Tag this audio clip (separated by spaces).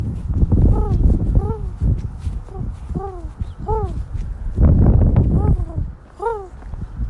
bird birds birdsong field-recording nature owl spring